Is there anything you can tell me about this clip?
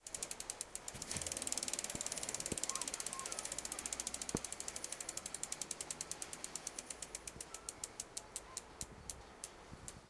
This sound was recorded in Laspuña (Huesca). It was recorded with a Zoom H2 recorder. The sound consists on manual spin of a bicycle wheel.